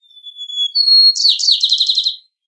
A piece of Nature. Individual bird chirps and phrases that were used in a installation called AmbiGen created by JCG Musics at 2015.
field-recording, forest, bird, birdsong, birds, nature